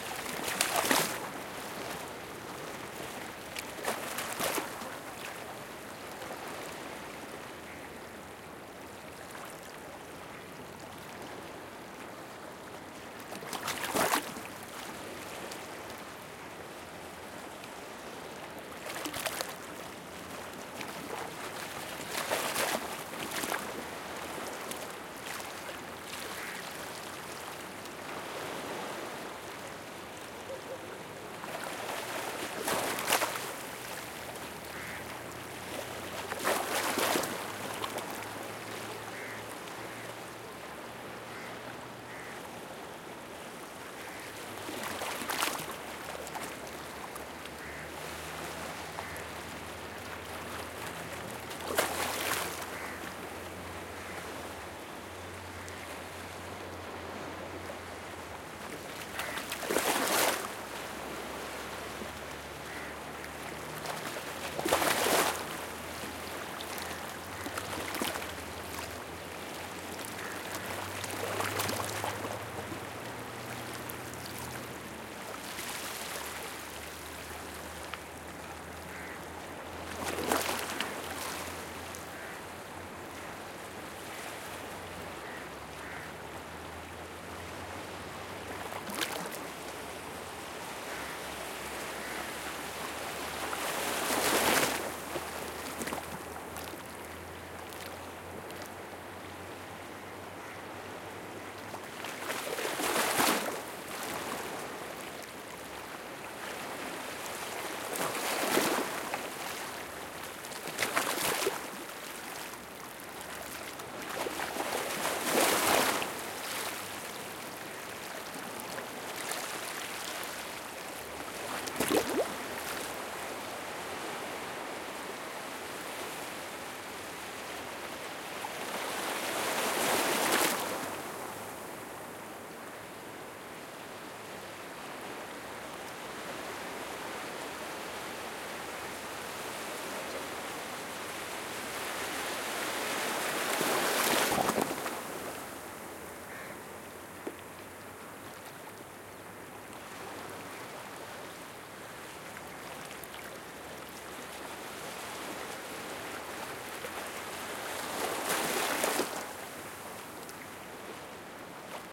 waves ocean or lake light lap at rocks beach Ganpati shrine marina boats tied up +some distant traffic India
marina, or, light, waves, lap, boats, rocks, ocean, India, shrine, Ganpati, lake, beach